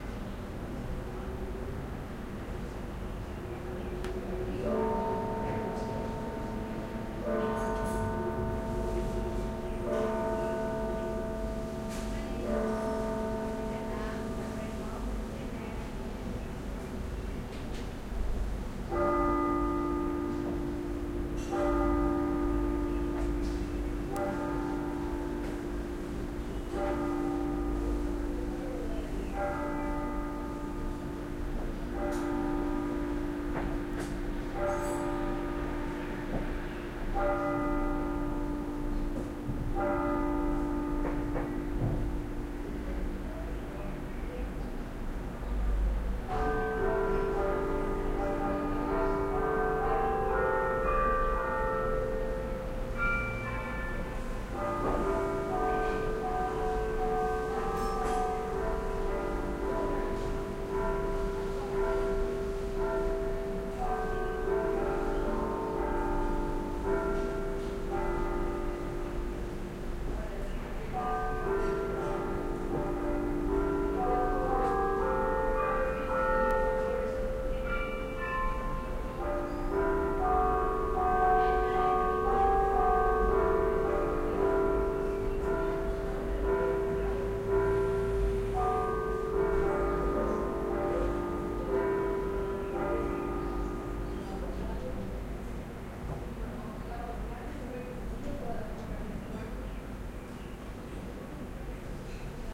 sagrada familia - 21h
Recording of the 21h bell ring of Sagrada Familia church in Barcelona. Recorded at a bedroom in the 6th floor of a building close to the cathedral at April 25th 2008, using a pair of Sennheiser ME66 microphones in a Tascam DAT recorder, using a XY figure.
alreves, 21h-bell, church-bell, Spain, programa-escuta, field-recording, Barcelona, sagrada-familia